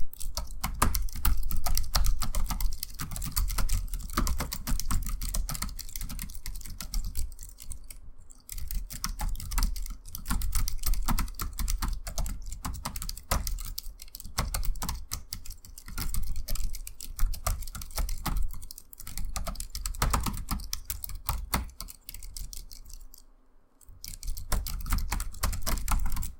keyboard typing
my laptop keyboard
key, keyboard, keystroke, type, typing, typography